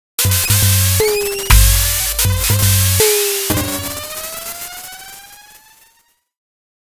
Broken Drum Machine- Tabla Beatz 02
These short loops were made with a VST called Broken Drum Machine that emulates the sounds of a circuit bent drum machine.
glitch, beats, tribal-percussion, tribal, bent, circuit-bent, percussion, broken, distorted, noise, tabla